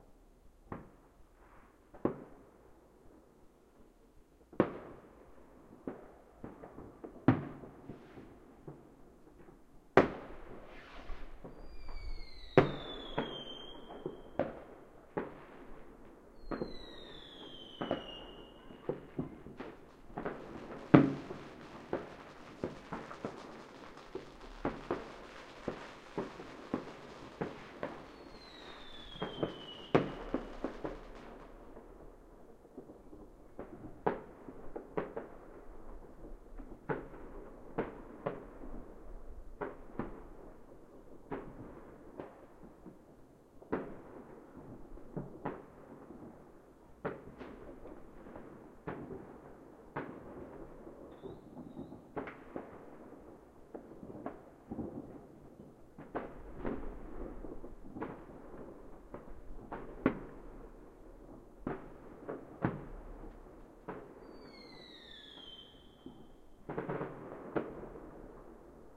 Fireworks, recorded with a Zoom H1.